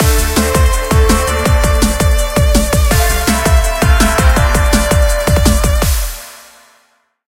A short snippet, could work for as a victory sfx or something.